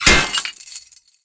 A metal trap being triggered sound to be used in fantasy games. Useful for all kinds of physical traps surprising victims.
epic, fantasy, game, gamedev, gamedeveloping, gaming, indiedev, indiegamedev, metal, sfx, trap, video-game, videogames